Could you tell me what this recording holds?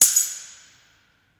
Dropping keys on a stairway with huge natural reverb